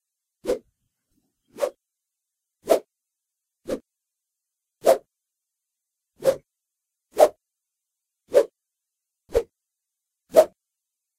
Whoosh! There it is!
I recorded a thin cylinder of wood whooshing around with my Tascam DR-05. Probably the best whoosh you'll ever get. For free, that is. You're welcome.